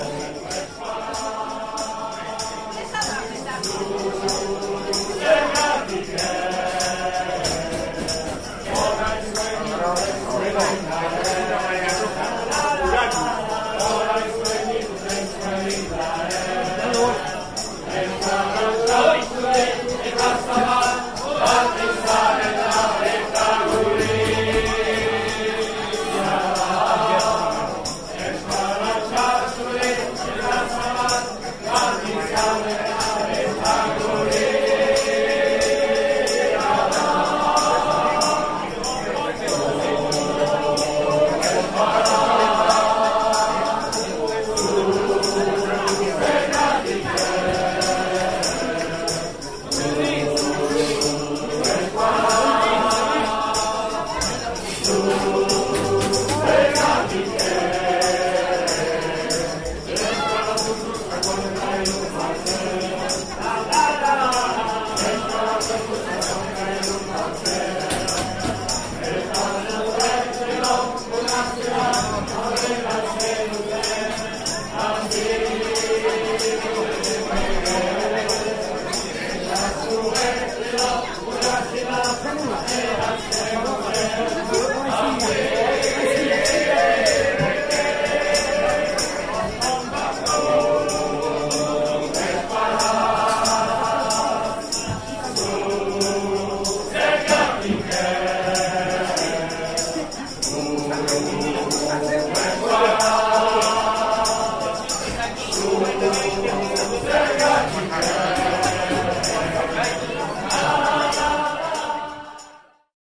rugby club in spain
Rugby fans sing to tambourine accompaniment in a tavern in San Sebastian, Spain. Field recording on Marantz PDM 620 April 10, 2011.
basque, field-recording, restaurant, rugby, singing, spain, tambourine